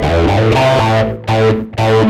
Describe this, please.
I don't do many "loops" so not sure of BPM. Got the idea while making the Dynabass sample pack and decided to throw these in. Plan on using them to make a song. Edit points might need some tweaking.